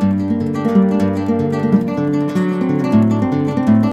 This will loop perfectly at 122.517 BPM. Flamenco guitarist.